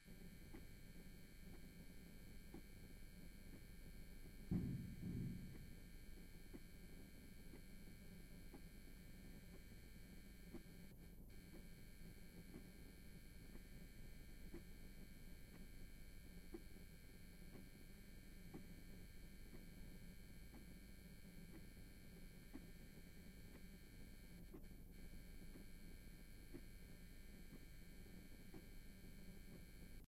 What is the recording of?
The closet of a large, empty spaceship